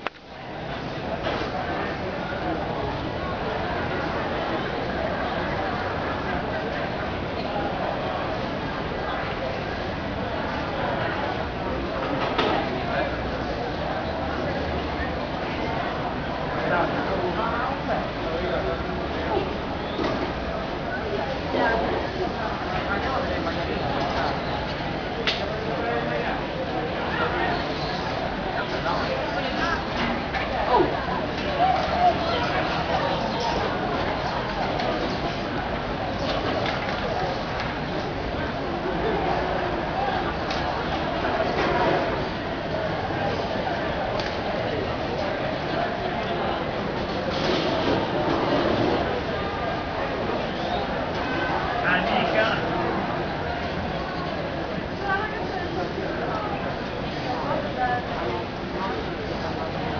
ambience in bars, restaurants and cafés in Puglia, Southern Italy. recorded on a Canon SX110, Bari
restaurant, italy, bari, field-recording, chatter, caf, ambience